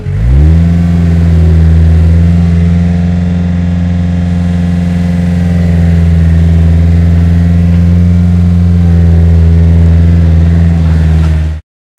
Small Bulldozer Engine
Engine of a bobcat (Small bulldozer)